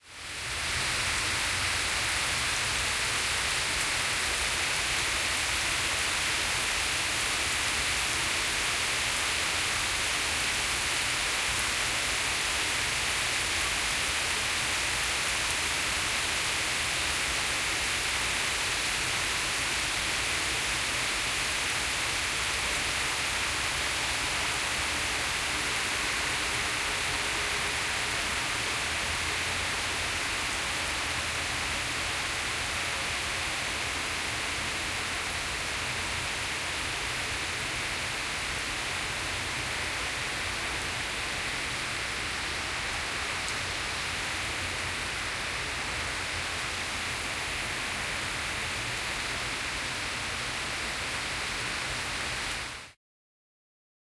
binaural lmnln rain outsde
Binaural recording of rain recorded outside in the city of Utrecht. The interesting part is on the end when the recording person makes a few rounds, resulting in the feeling like 'the world turns around'.
atmosphere, binaural, noise, rain